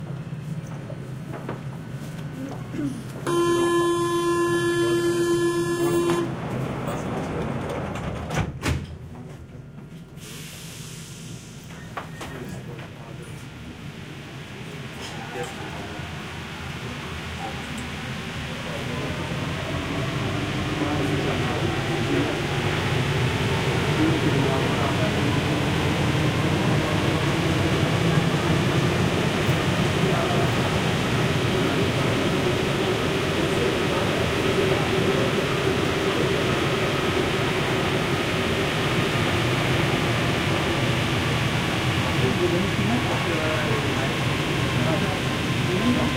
017-Inside Parisian metro going
Line 1, 2012, Blumlein stereo recording (MKH 30)
go, inside, metro, Parisian